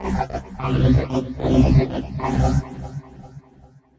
THE REAL VIRUS 11 - VOCOLOOPY - C2
A rhythmic loop with vocal synth artifacts. All done on my Virus TI. Sequencing done within Cubase 5, audio editing within Wavelab 6.
loop, vocal